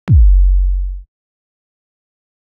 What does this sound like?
Electronic Kick sound.
Instruments, samples and Max for Live devices for Ableton Live:
Bass-Drum, Drum, Drums, EDM, Electronic, House, Kick, Sample